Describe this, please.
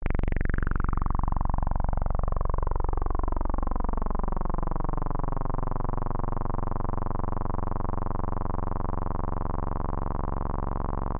Sound made with MinimogueVA
vst, electronic, synth, lead, minimogue